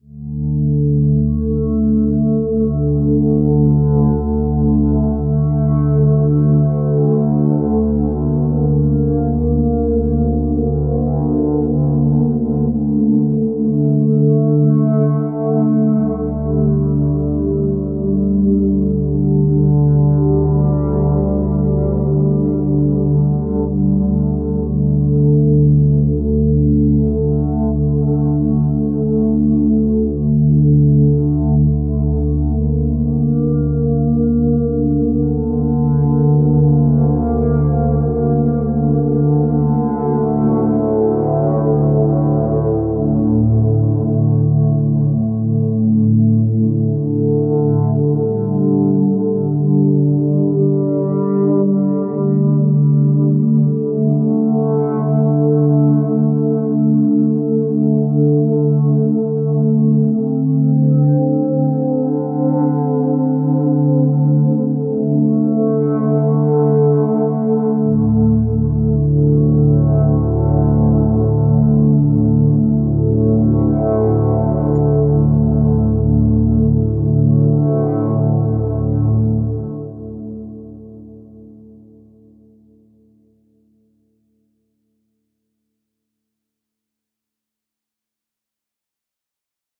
space ambience
ambient, ambiance, background, dreamy, synth, atmosphere, space